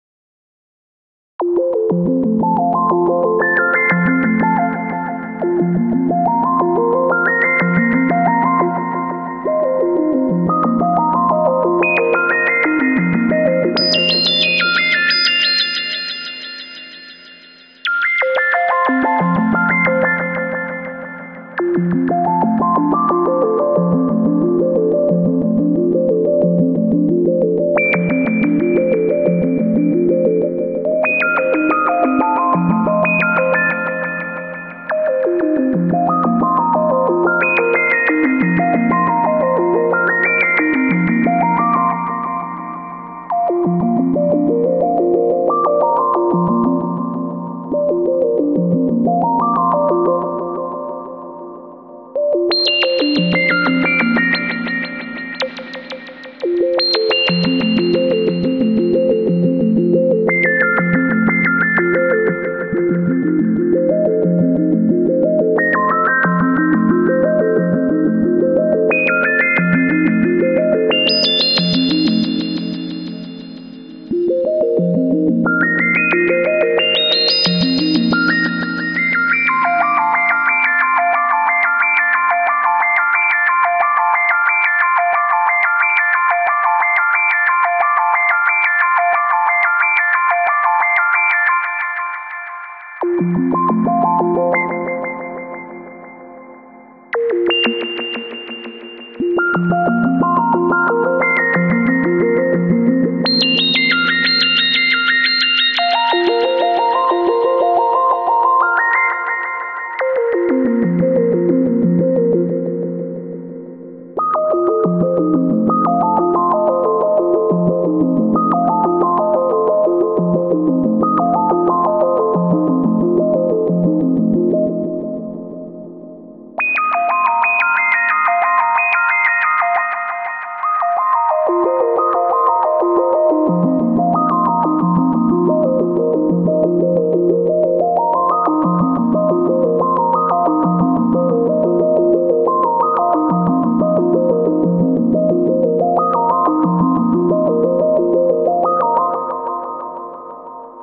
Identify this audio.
A lighthearted bit of synth sound called Space Arp played in F.